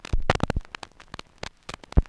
Various clicks and pops recorded from a single LP record. I carved into the surface of the record with my keys, and then recorded the needle hitting the scratches.
glitch, record, analog, noise